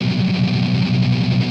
Recording of muted strumming on power chord B. On a les paul set to bridge pickup in drop D tuneing. With intended distortion. Recorded with Edirol DA2496 with Hi-z input.